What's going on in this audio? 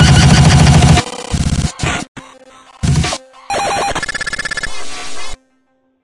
Flower Power 2
these are some rEmixes of hello_flowers, the ones here are all the screaming pack hit with some major reverb
cut in audacity, tone and pitch taken down and multiplied compressed,
and run through D.blue Glitch, (mainly a stretcher a pass a crush and
then a gate etc.) There are also some pads made from Massive.
remix, ambient, ugly-organ